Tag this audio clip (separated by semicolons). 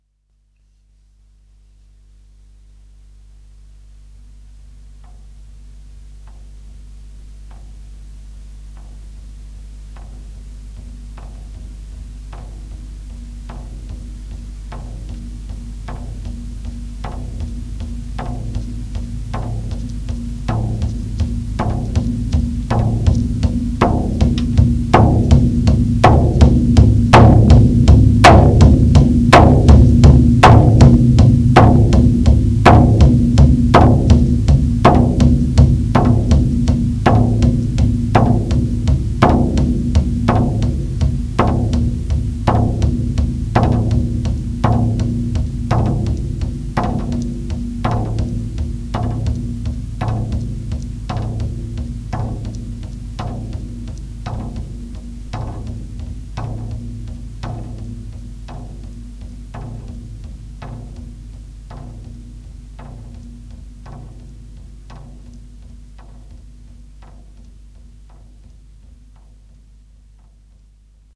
drums; play